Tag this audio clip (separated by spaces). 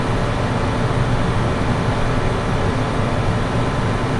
air-contitioner indoor loopable